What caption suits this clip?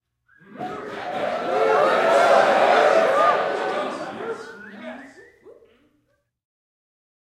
M Short approval - staggered alt
These are canned crowd sounds, recorded for a theatrical production. These were recorded in quad, with the design to be played out of four speakers, one near each corner of the room. We made them with a small group of people, and recorded 20 layers or so of each reaction, moving the group around the room. There are some alternative arrangements of the layers, scooted around in time, to make some variation, which would help realism, if the sounds needed to be played back to back, like 3 rounds of applause in a row. These are the “staggered” files.
These were recorded in a medium size hall, with AKG C414’s for the front left and right channels, and Neumann KM184’s for the rear left and right channels.